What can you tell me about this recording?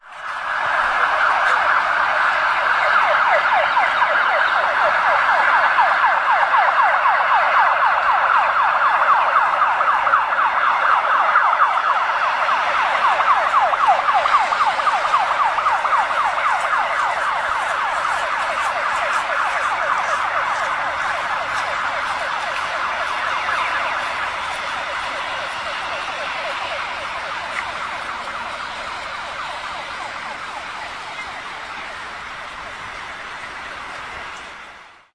ambulance bridge 300510
30.05.2010: about 22.00. The ambulance signal recorded from Ostrow Tumski(an islet in Poznan/Poland) - more detailed on the Zagorze street which has been flooded (we are having the main flood wave at this moment on the river Warta in Poznan). The ambulance was passing by the MieszkoI bridge.